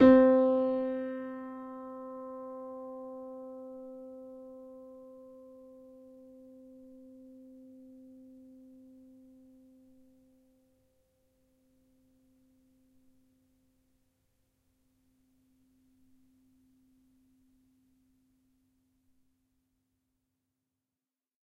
upright choiseul piano multisample recorded using zoom H4n
choiseul, multisample, piano, upright